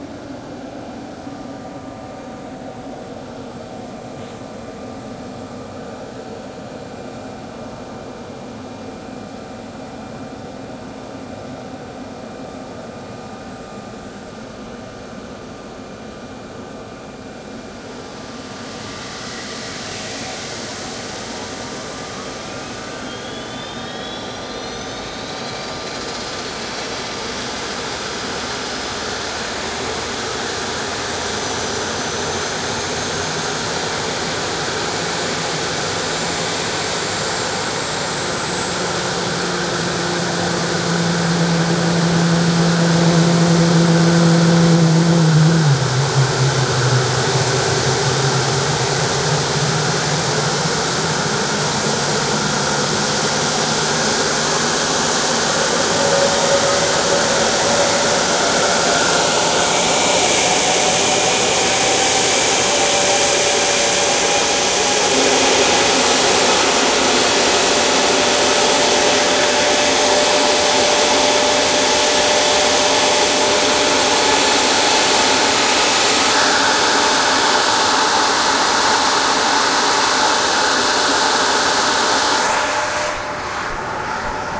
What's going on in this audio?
jet engine start, CRJ 900
You will also be able to hear the APU in the background before the engine starts because that's how airplanes work. Some airplanes just have quieter APUs.
Recorded with ICD UX560, possibly while using a deadcat.